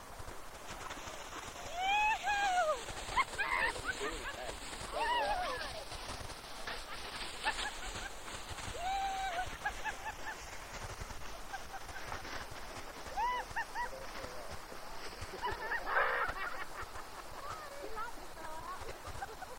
Ruth Glacier 20190603 113443 skiiers laugh and whoop
A group of skiers riotously descend a slope in the Don Sheldon Amphitheater of Denali National Park. Sounds of their skis scraping the snow and laughter ring out over the mountainous glacial environment.
fun, whoop, people, skiing, shout, Alaska, outdoors, laugh, laughter, recreation